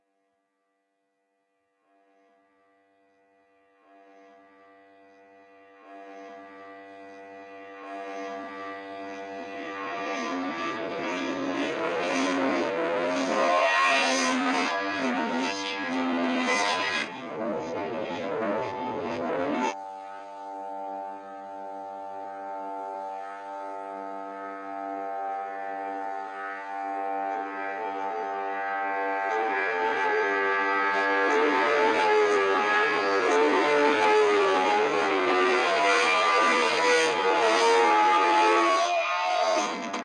tk 11 mic scrape 3 amp 2
A heavily processed sound of a mic scraping on guitar strings.
electronic, guitar, music, processed